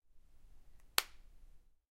A money clip/wallet (you know those little card holders made of metal that can also hold bills, yeah one of those) being closed. Could be used as a snare layer.